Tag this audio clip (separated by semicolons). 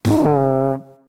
joke; French; horn; voice; bad; FX